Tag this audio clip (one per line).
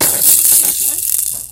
percussion
rattle
toy